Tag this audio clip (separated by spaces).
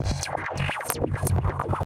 drums bateria drum electronic percussion percs